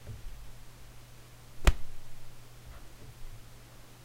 Some fight sounds I made...
combat, fight, fighting, fist, hit, kick, leg, punch